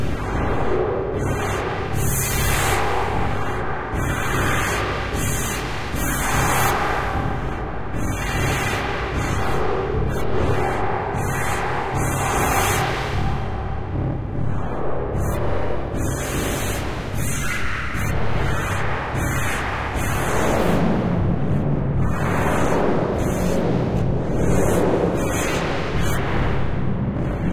Cloudlab 200t V1.2 (Buchla Software Emulation) » 0009 Mix-15
Cloudlab-200t-V1.2 for Reaktor-6 is a software emulation of the Buchla-200-and-200e-modular-system.
Reaktor-6, Buchla-200-and-200e-modular-system, Buchla, 2, Cloudlab-200t-V1